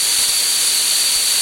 A Casio CZ-101, abused to produce interesting sounding sounds and noises